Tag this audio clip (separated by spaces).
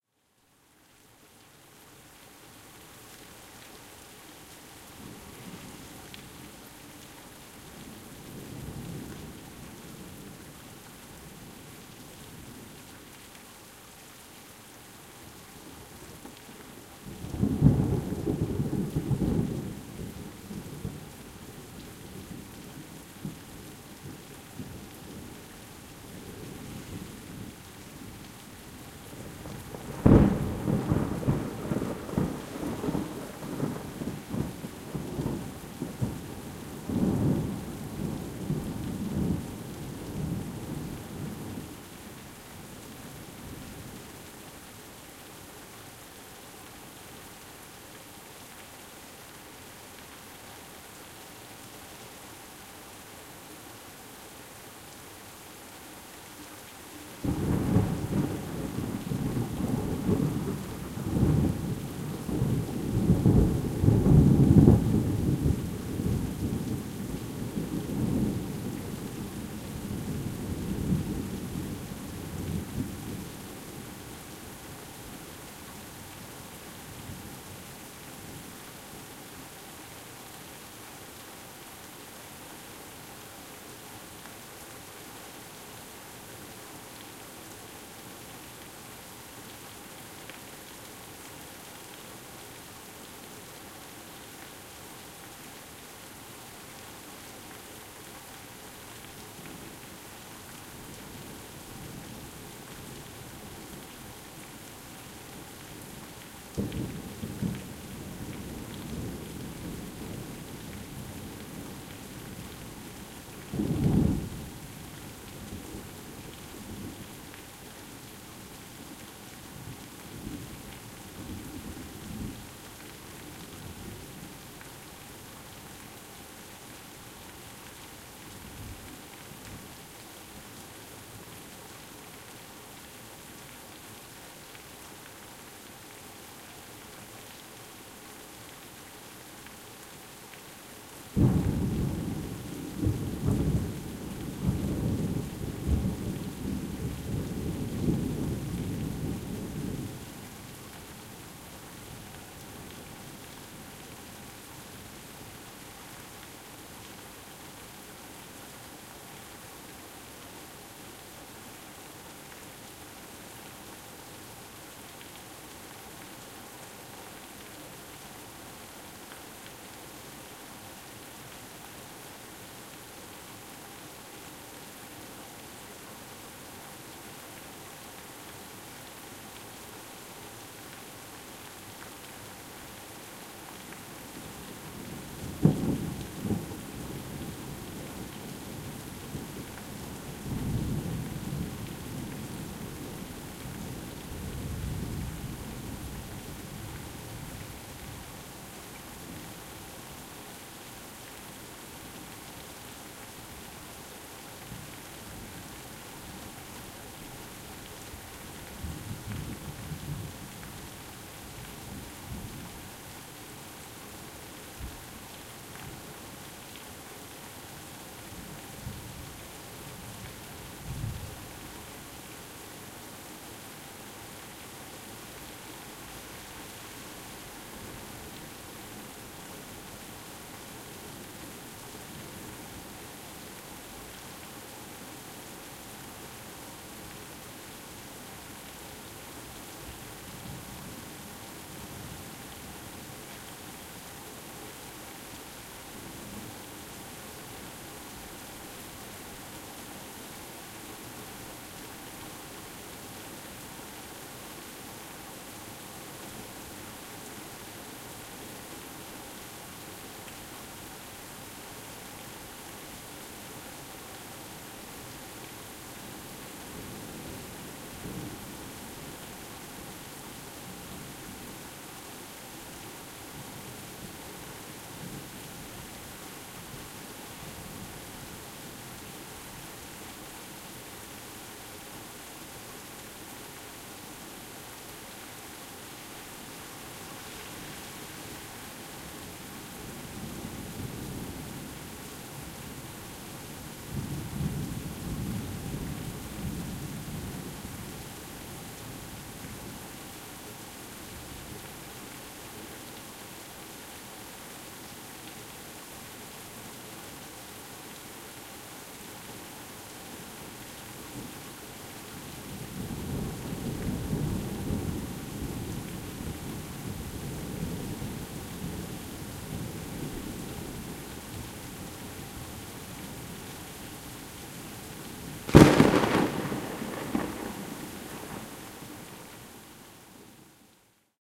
rain strike thunder thunder-storm wind